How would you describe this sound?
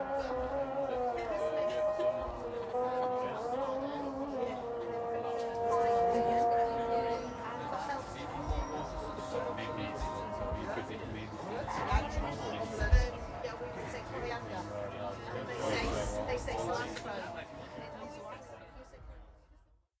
Street Ambience muezzin 3
Recorded in Agadir (Marocco) with a Zoom H1.
street, talk